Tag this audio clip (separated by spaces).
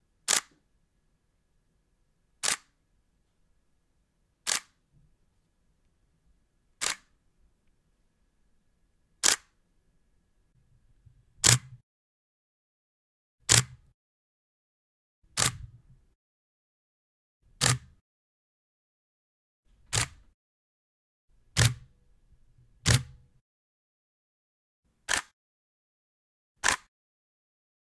camera-shutter shutter-sound shutter dslr-shutter nikon-shutter nikon shutter-click dslr camera slr